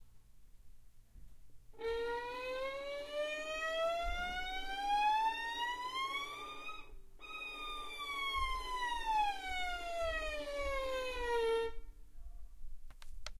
Aslide updown slow
Slide effect was created with a standard wood violin. I used a tascam DR-05 to record. My sounds are completely free use them for whatever you'd like.
climb, violin, slide, sad, falling, fall, stretch, down, depressing, question, up, arouse